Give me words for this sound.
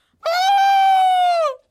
a girl shouting for a terror movie. 666 movie scream UPF
shout, screaming, 666moviescream, upf, movie, frighten, scream